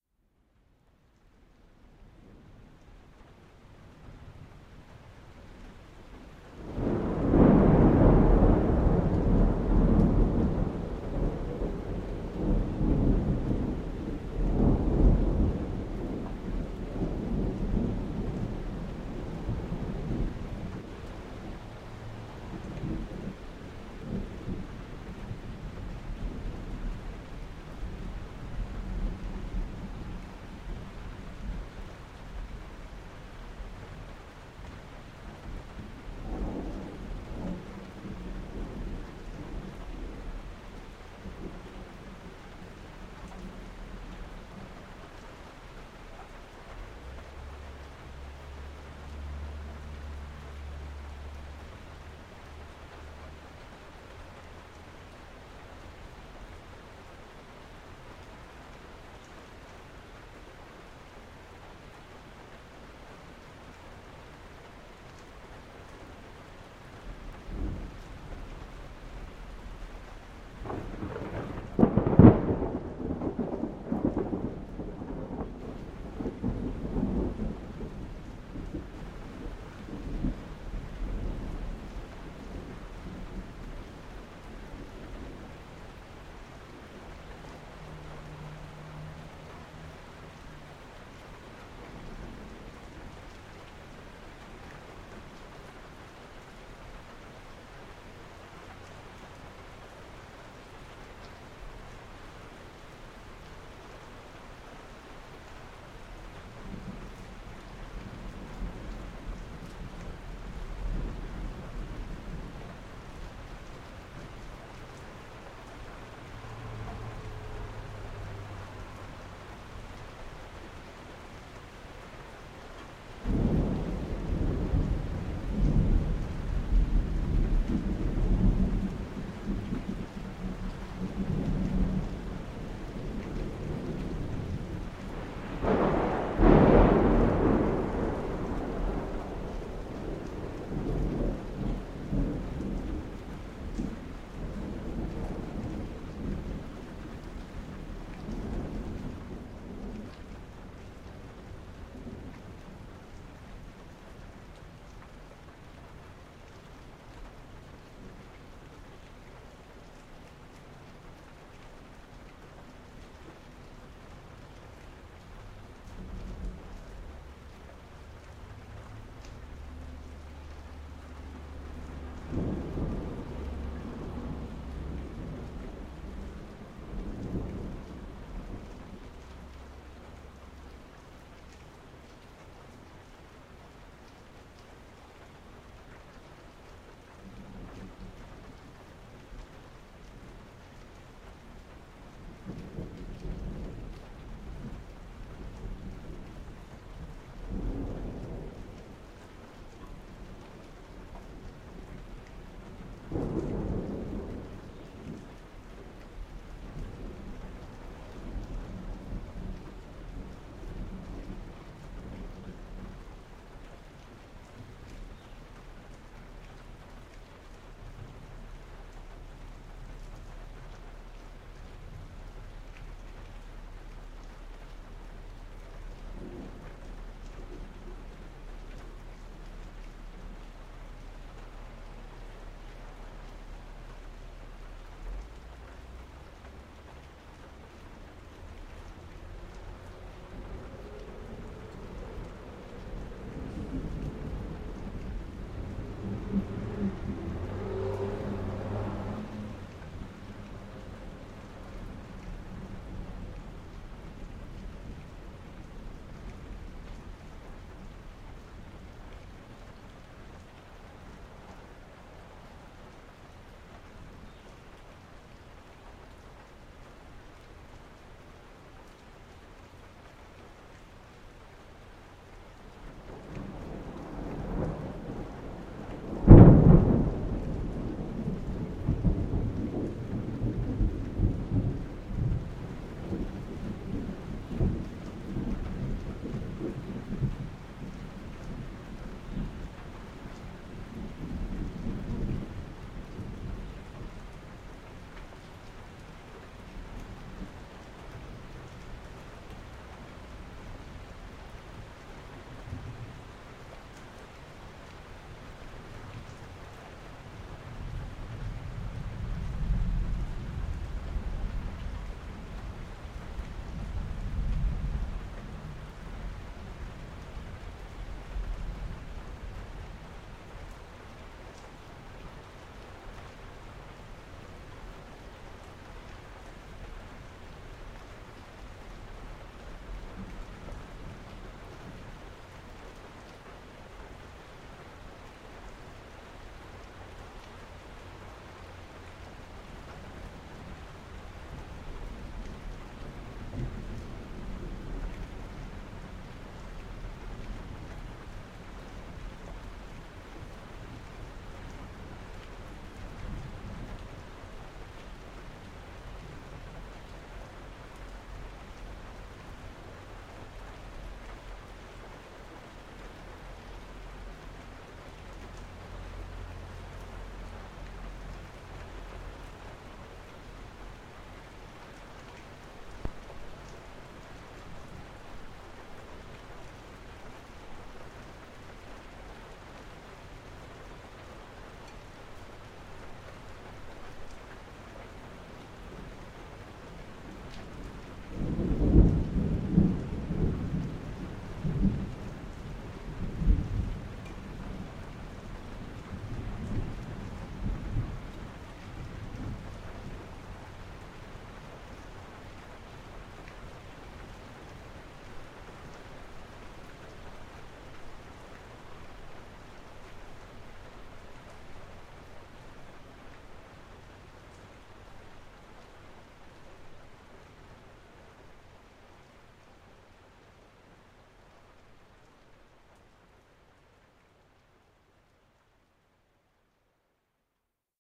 A brief mono recording of an afternoon thunderstorm. There is a tad of background traffic noise.